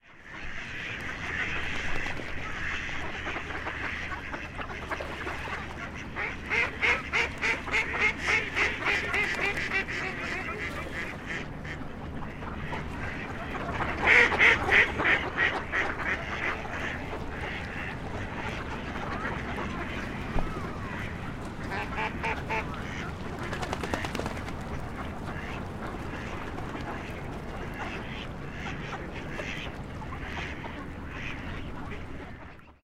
Park ambience with ducks